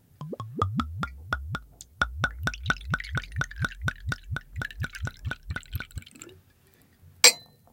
pouring wine, from bottle into a glass. Sennheiser MKH 60, Shure FP24 preamp, Edirol R09 recorder
bottle, cup, glass, glug, wine